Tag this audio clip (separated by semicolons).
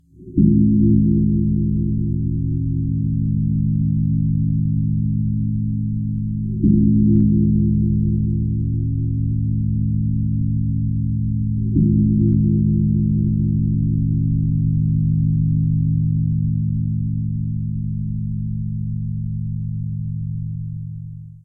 buddism; terrifying